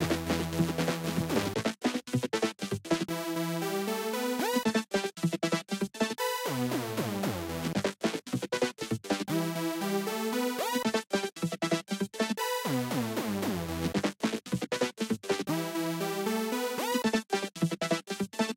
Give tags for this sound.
free
synths
electronica
braindance
idm

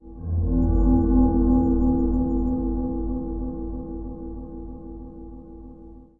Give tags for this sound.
chord
eerie
mysterious
processed
reverberated

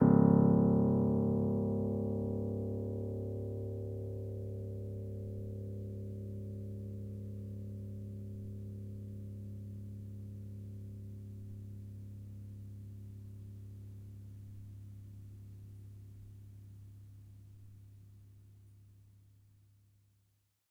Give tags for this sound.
choiseul
piano
upright

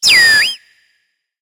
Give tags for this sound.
alien
animal
creature
fauna
sci-fi
sfx
sound-effect
synthetic
vocalization

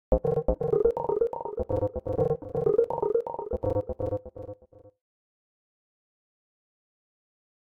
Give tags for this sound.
124bpm
delay
instrumental
loop
minimal
sound
sound-effect
tech
techno

